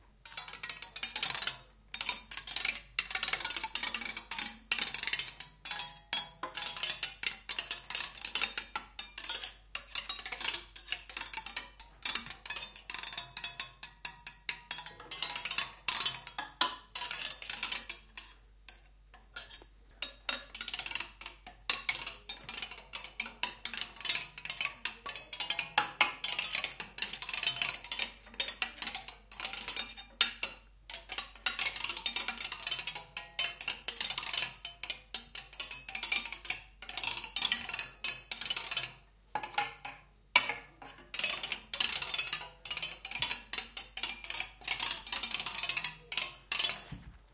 Schraubzwingen-Klavier
Recorded in a workshop. About 40 hanging screw-clamps, randomly struck with a metal stick.
Arhythmic, Chaotic, Fast, Loud, Metallic, Rattle